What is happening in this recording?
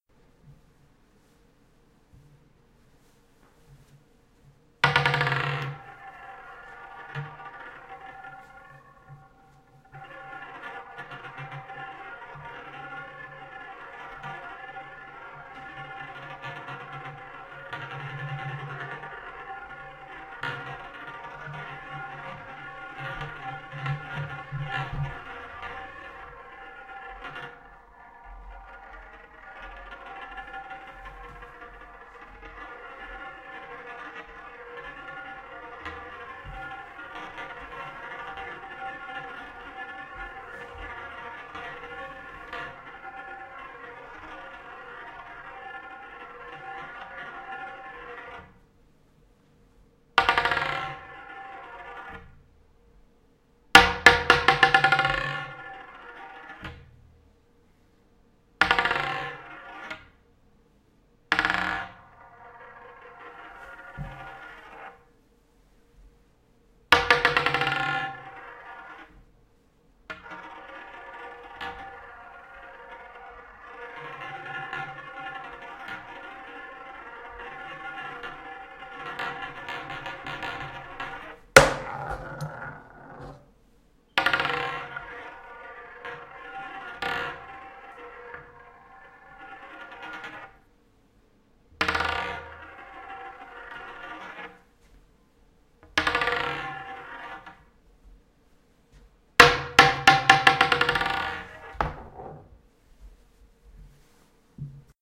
Ball on table
I recorded this for a pinball game I worked on. It's multiple sounds of a marble being dropped onto a hard table and rolling around. It's meant to simulate the sound of a pinball being put into play on a pinball table. Enjoy!
Pinball-roll, Marble, Clack, Drop, Multiple-drops, Pinball, Rolling, Multiple-rolls, Sharp, Marble-on-table, marble-bounce